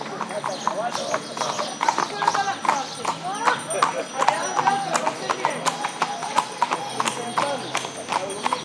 20080504.horse.drawn.01
a horse-drawn carriage passing by, horse-bells, and people talking in Spanish. Shure WL183 pair into Fel preamp, Edirol R09 recorder.